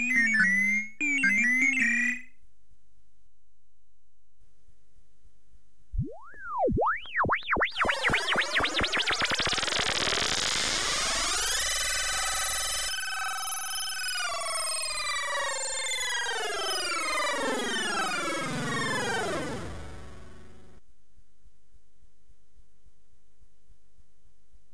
parantaja1 - health recovery instrument 1
some kind of machine I did in one project and called it health-recovery machine. Done with clavia nordlead2
future, sci-fi, machine, imaginary, synthetic, scifi, electric, strange, health-recovery, sounddesign